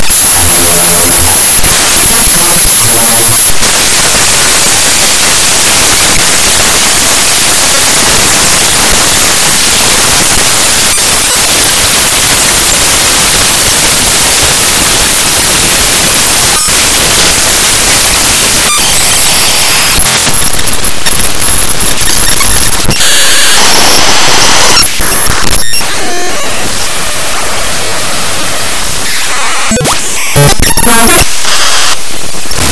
This one is kind of like screeching as well as bars, and of course, they have static sound included. More will be added soon when I make more!